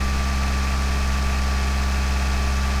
SFX Car Engine Outside RPMMed
Recording of a BMW 120d car engine from outside the car. Medium RPM.
Earthworks QTC30 and Rode NT4.
Engine, Outside, RPM, SFX